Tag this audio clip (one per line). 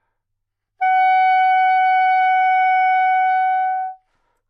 sax
Fsharp5
soprano
neumann-U87